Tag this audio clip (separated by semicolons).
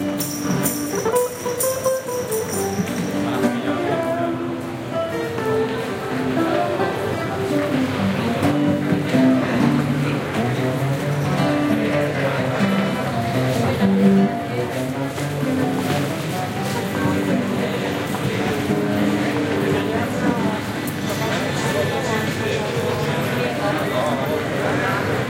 binaural; summer; ambiance; streetnoise; field-recording